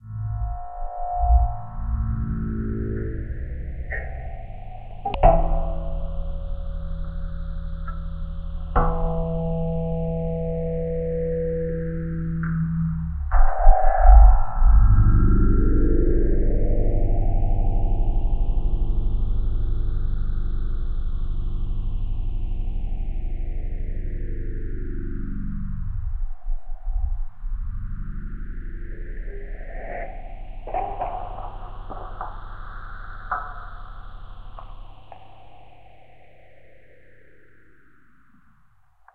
juskiddink Tuning a Roland Oetter acoustic guitar endtitles-rwrk
remix of "Tuning a Roland Oetter acoustic guitar" added by juskiddink (see remix link above)
slow down, filter, lfo phaser, compression
abstract, backgroung, cinematic, electro, elettroacoustic, film, filter, guitar, illbient, movie, post-rock, processing, remix, rock, score, soundesign, soundtrack, space